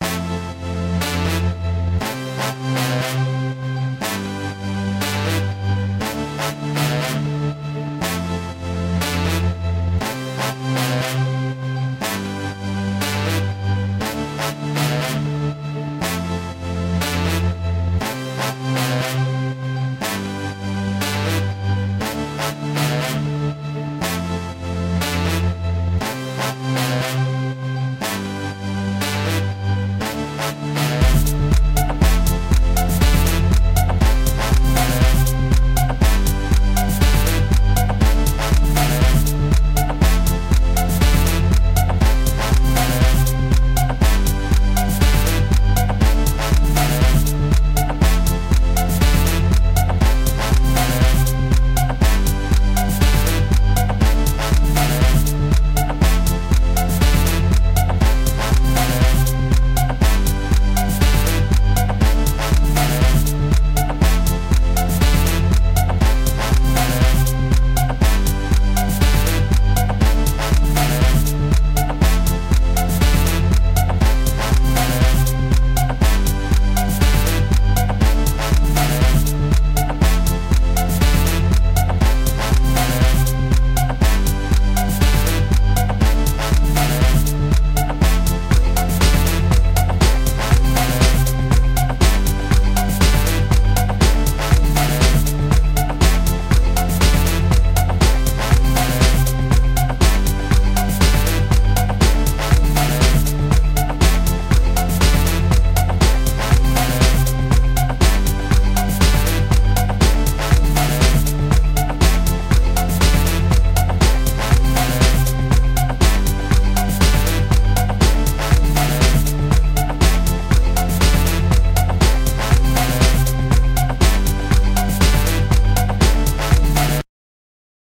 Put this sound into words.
Happy Music
Beat, Dance, Drums, Free, Funk, funky, Loop, Music, Sound